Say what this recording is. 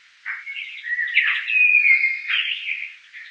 These are mostly blackbirds, recorded in the backyard of my house. EQed, Denoised and Amplified.
bird, blackbird, field-recording, nature, processed